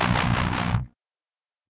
system grind 01
Alvarez electric through DOD Death Metal pedal mixed to robotic grinding in Fruity Loops and produced in Audition. Was intended for an industrial song that was scrapped. Approximately 139.5bpm. lol
grind, dubstep